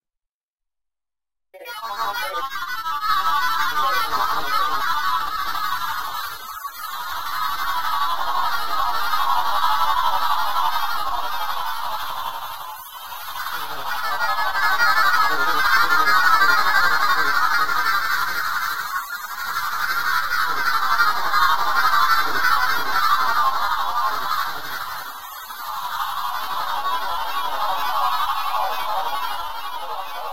A small collection of SYnthetic sounds of varying frequencies. Created with amSynth and several Ladspa, LV2 filters.
Hope you enjoy the sounds. I've tried to reduce the file sizes due to the low bandwidth of the server. I hope the quality doesn't diminish too much. Didn't seem too!
Anyhoo... Enjoy!